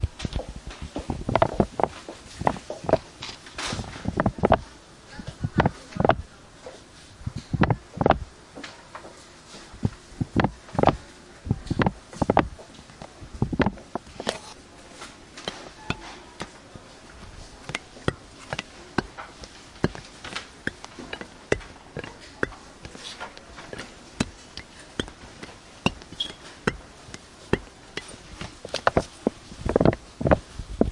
Sounds made with a detergent bottle. Some knocking and gurgle sounds.